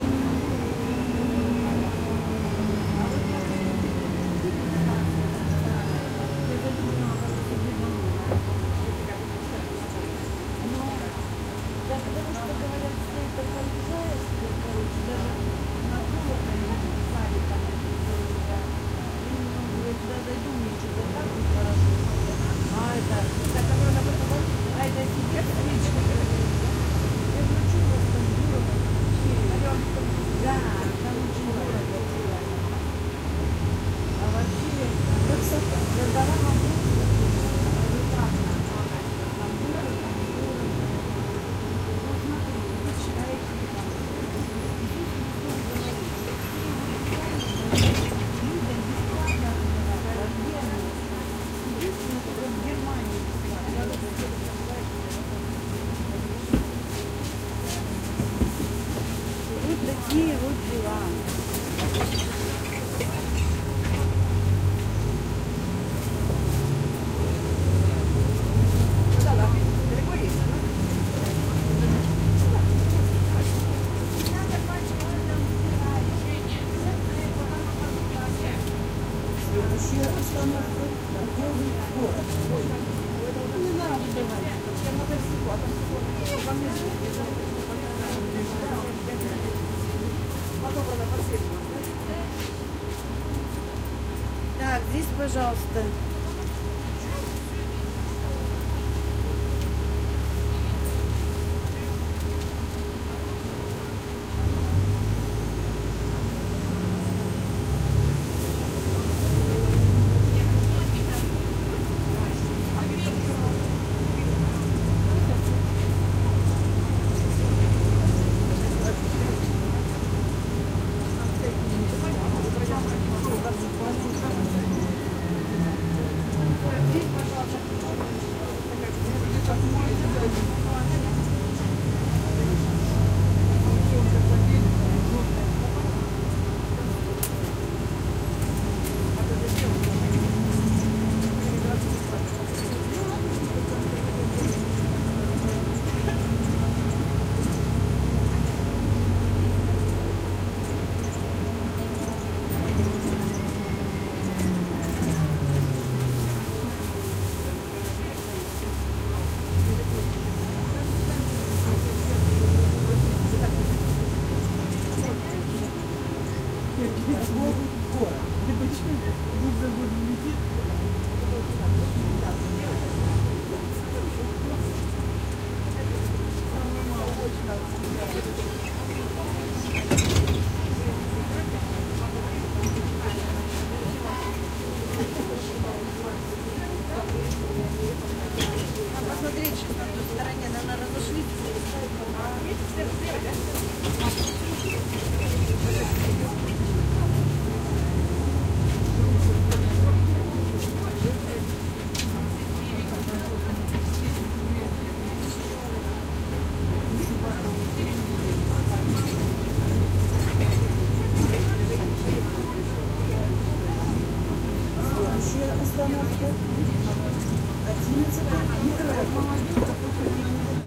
busTrip Omsk st70thAnniversaryOktyabrya 20120212
Trip in the bus (route #14).
Russian talk.
Low-pass filter on 77Hz
Omsk city, West Siberia, Russia.
st. 70 October (ул. 70-летия Октября)
2012-02-12
bus-stop, town, Siberia, West-Siberia, Russia, noise, bus, russian-talk, Omsk, talk, passenger, people, urban, 2012, transport, city, trip